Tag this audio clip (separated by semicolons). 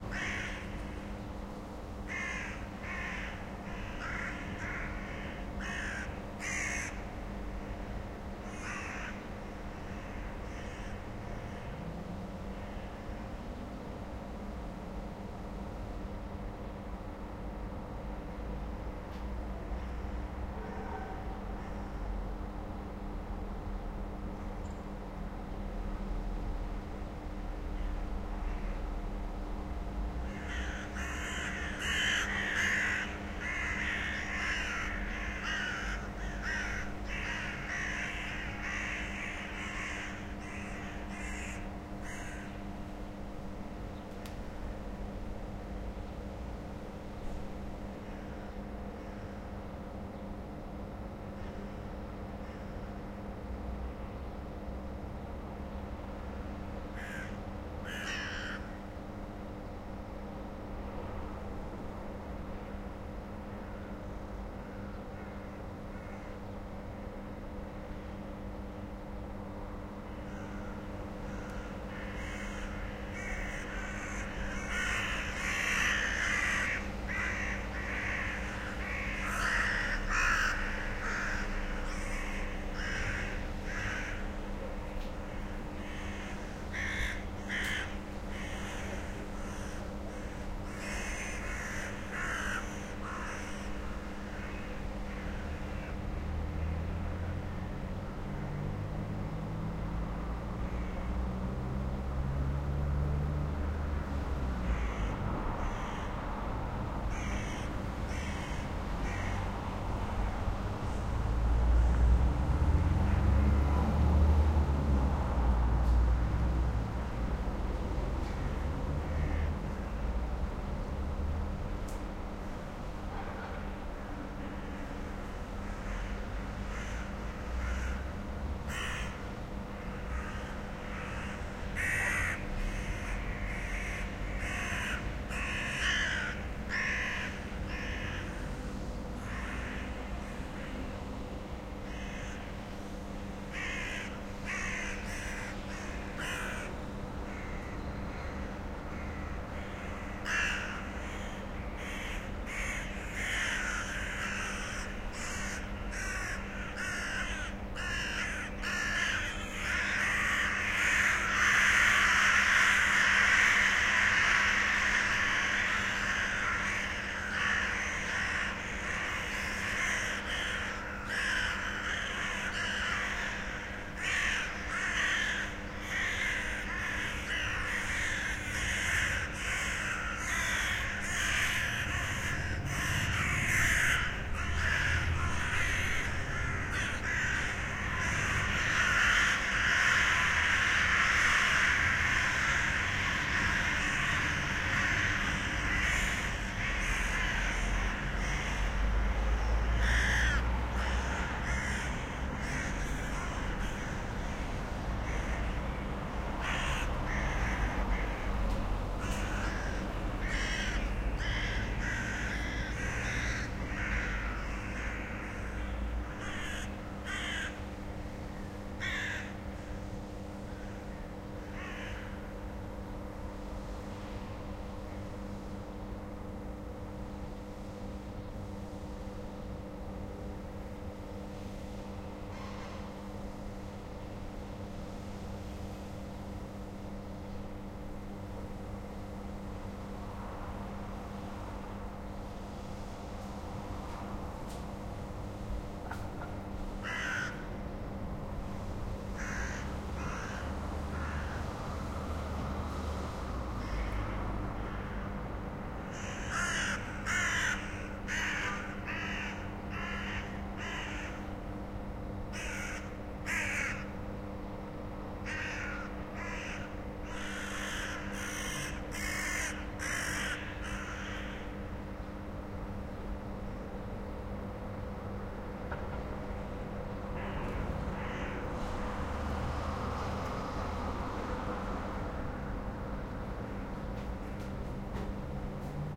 birds; field-recording; ambience; city; crow; bird; atmosphere; crows; raven